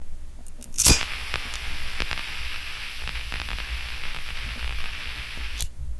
Ignition of gas lighter
smoking, burning, burn, lighter, fire, light, cigarette, flame